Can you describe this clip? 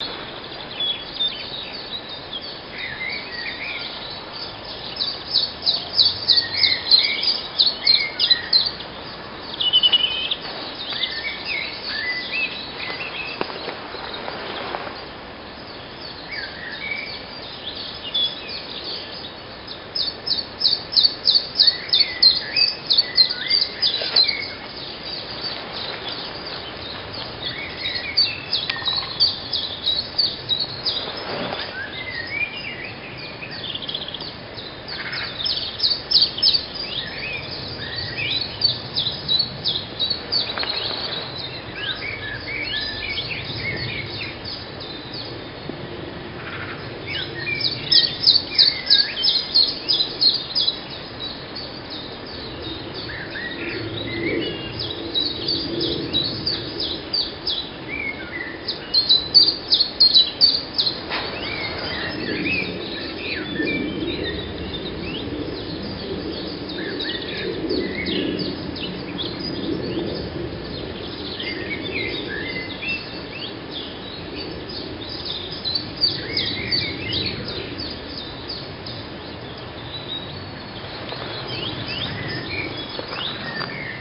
morning atmo june - wood - garden
in an early morning in june birds are singing between gardens and forest in germany. A plane flies by.
blackbird, blue, chiffchaff, great, robin, tit